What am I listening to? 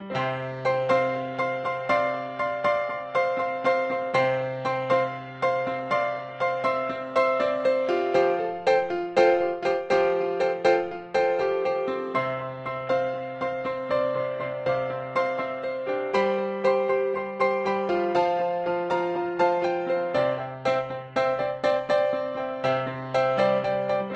Song3 PIANO Do 4:4 120bpms
Piano, 120, Do, bpm, HearHear, Chord, rythm, beat, loop, blues